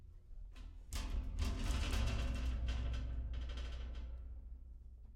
Playing with a noisy metal shelf to get a bunch of different sounds. Recorded with a Rode NTG3 shotgun mic into a Zoom F8 field recorder.